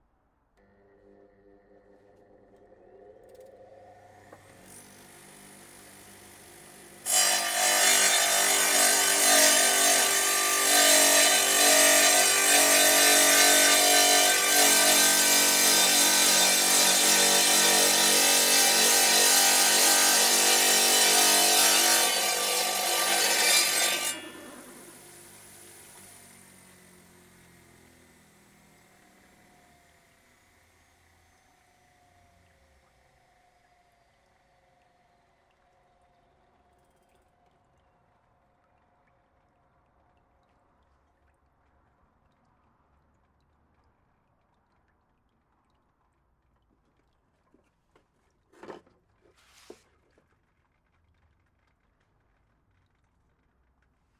Operating a Table Saw to cut a variety of slabs. Water dust suppression was used and the dripping water can be heard as the saw winds down after the cut.